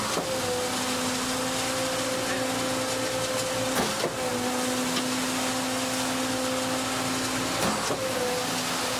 Mix spodni
Pouring concrete into a pump
constrution-site, machinery, concrete